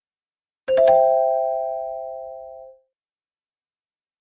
Bell chord1
bells, chord, ding